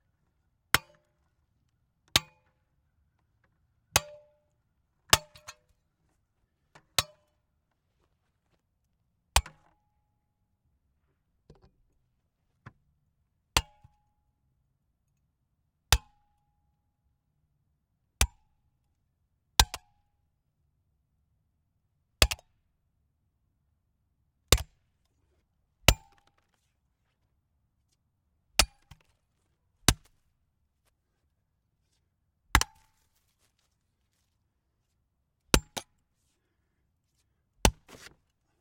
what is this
Hitting a broken metal Kitchenaid pasta maker with a sledge-axe.
junkwham, hitpastamaker, thud, impact, metal